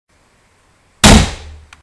A gunshot sound that I made by Banging my hand down on my wooden dining room table.